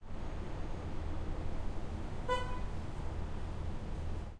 Car Horn Honk 01- Single, Distant - EXT Day Brooklyn Street corner ambience
This is a field recording from a window on a street corner in Williamsburg, Brooklyn, during a summer afternoon in August 2019. This is a short clip of a single distant horn honk from a car.
This is a short clip intended to be a background texture for sound design, longer version also available.
Recorded with a Neumann Stereo Pair into the Sound Devices 633.
ambiance, Ambience, Ambient, atmos, atmospheric, background, background-sound, Brooklyn, car, city-rumble, Field-recording, honk, horn, New-York-City, NYc, traffic